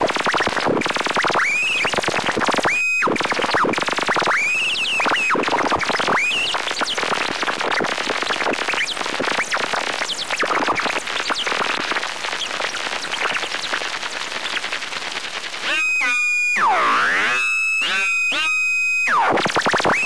Sound is a Boss DD-20 delay feeding back on itself while the controls are manipulated.